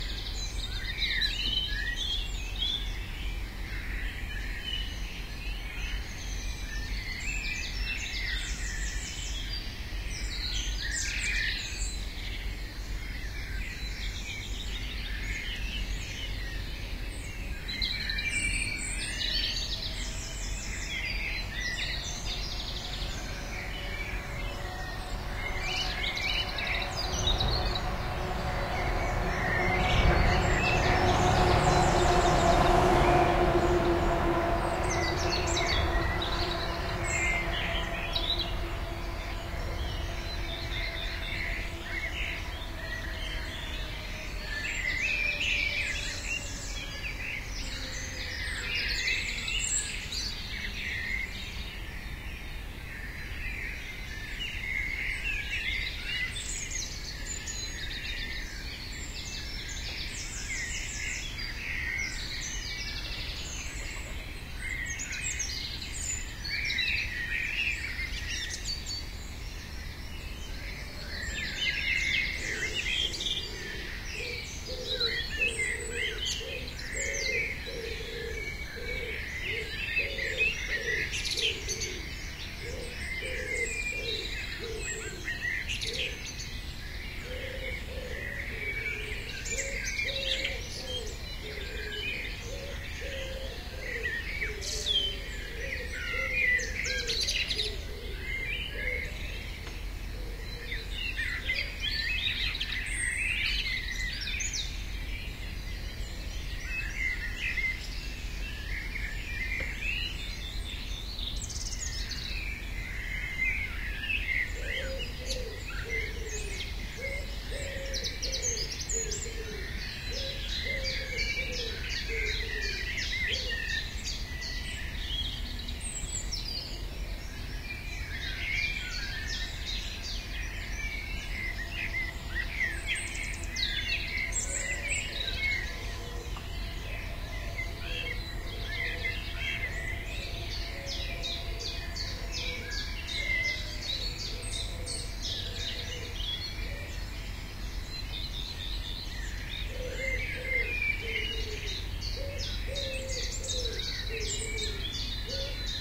20200603-042117-Bird Song Garden - Early Morning In Suburbs
Birdsong recorded very early in the morning, in the suburbs of a Danish town. Recording made in the month of May.
nature, morning, field-recording, early, suburbs, Scandinavia, ambient, bird, birds, spring, outdoor, animals, ambience, birdsong, Denmark